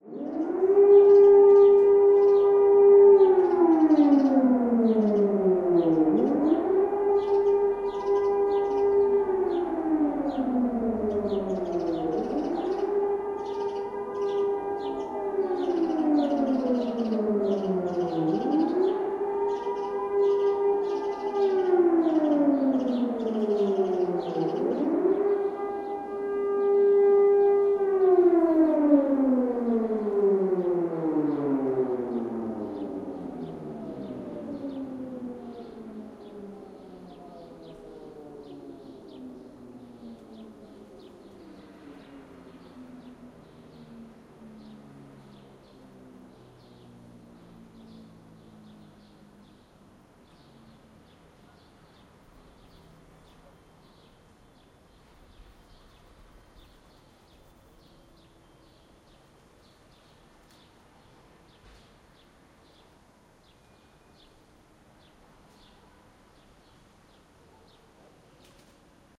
An air raid siren test in Israel . Some birds got really excited ! Recorded with AT822 mic , FR2LE recorder , and edited with Protools LE .